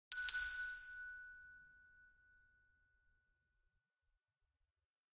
Shop Bell
Shop, door, ring, ringing, bright